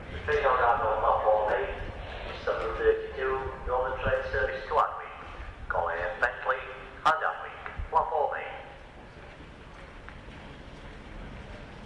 london platform anncmt 2
Station announcement on Doncaster station, Yorkshire, England. Some clipping on the loudest points - due to inadvertently using minidisc recorder on LP mode.